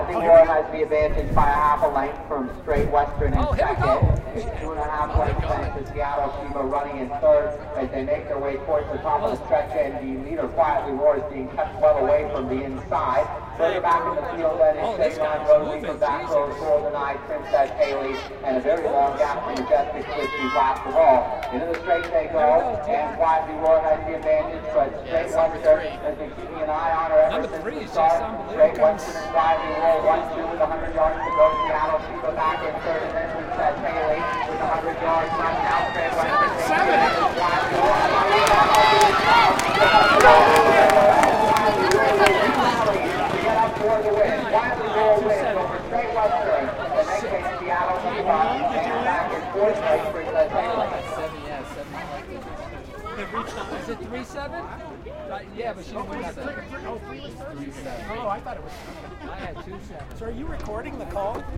crowd at the fence during a race
This is a recording of the crowd during a horse race at Arapahoe Park in Colorado. They are really loud and excited.